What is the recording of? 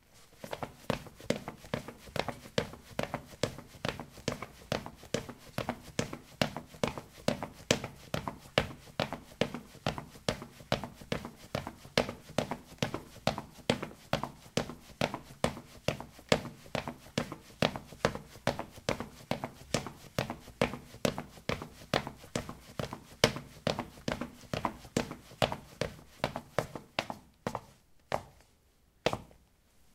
ceramic 12c squeakysportshoes run
Running on ceramic tiles: squeaky sport shoes. Recorded with a ZOOM H2 in a bathroom of a house, normalized with Audacity.
footstep, steps